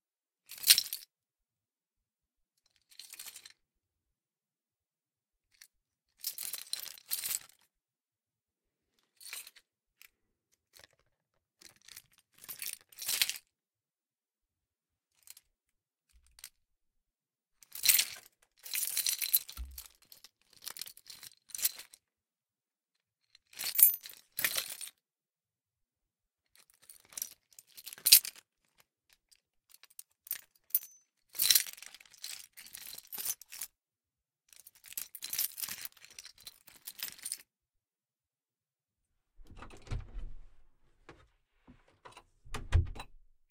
large keychain with all kinds of material - plastic & metal, falling into my hands.
clink, dink, keys, metal, tink